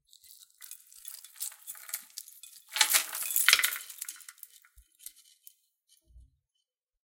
Jewelry moving sounds
creeks, Jewelry, soft